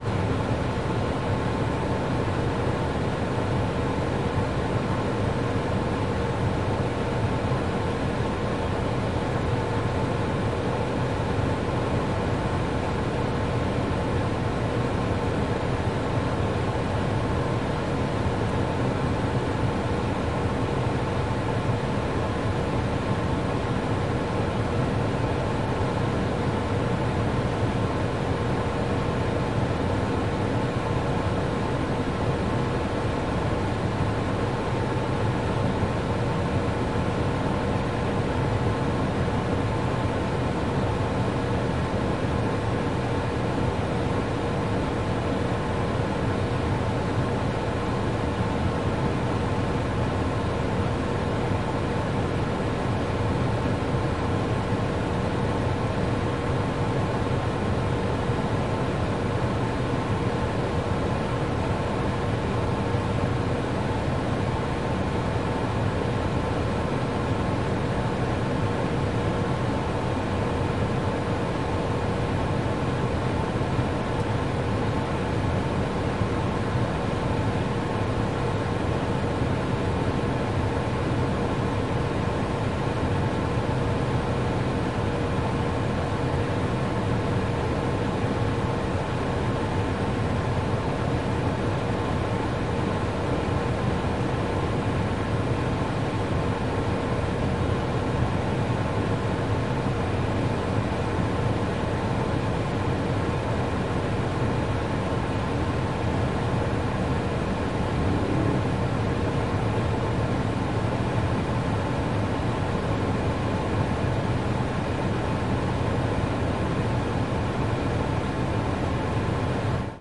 CAR-HEATER, Volkswagen Golf GLE 1.8 Automatic, heater full speed, engine off-0001
Part of Cars & other vehicles -pack, which includes sounds of common cars. Sounds of this pack are just recordings with no further processing. Recorded in 2014, mostly with H4n & Oktava MK012.
Volkswagen, vehicle, heater, car, automatic